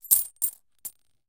coins - in cloth 09
Coins were dropped from about 20cm into a bowl that was covered with a folded blanket.
percussion, metallic, currency, jingle, percussive, money, coins, metal, cloth